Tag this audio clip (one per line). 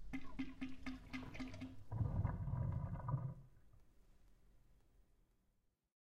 rinse
wash
up
run
washing
off
dishes
drain
water
do